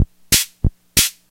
March Drum Loop extracted from the Yamaha PS-20 Keyboard. If I'm not mistaken, all drum loops are analog on this machine
Analog-Drum-Loop, Yamaha